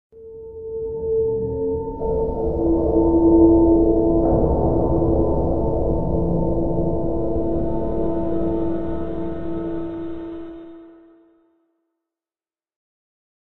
An eerie ghost effect I created from sampling metallic sounds and processing them
sci, sinister, fi, creepy, synth, haunted, eerie, phantom, spectre, ghost